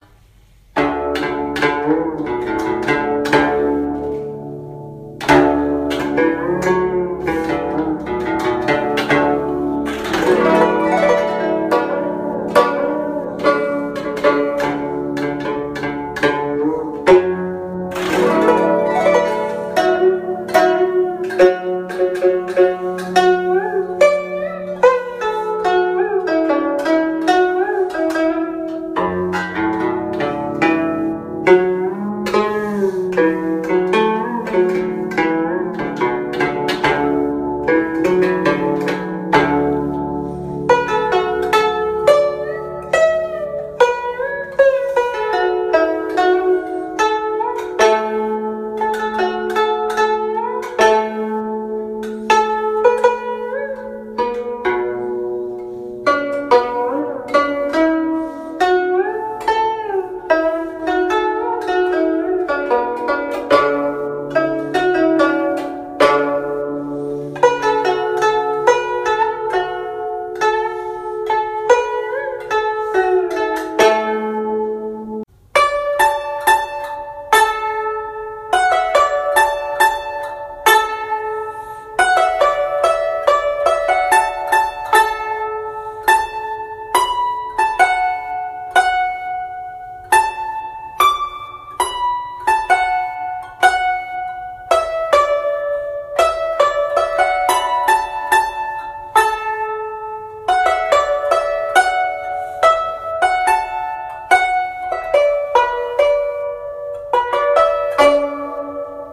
I played 2 minutes of the classic Mei Hua San Nong (梅花三弄), and recorded it with iPhone.
Gu, Chinese, string, music, Zheng, ancient, instrument